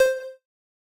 Beep 06 strong 2015-06-22
a sound for a user interface in a game
beep, click, game, user-interface, videogam